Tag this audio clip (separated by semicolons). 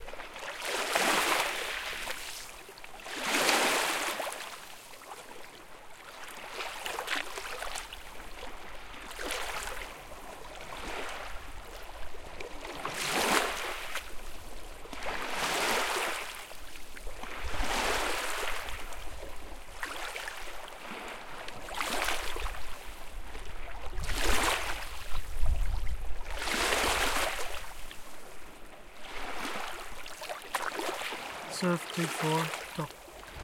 Lake; shore; sand; surf